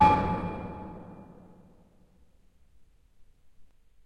Clang (mod)
I combined and modified editor_adp's "Clang 2" and "Clang 4" into something more impactful.
clang, hit, impact, reverb, steel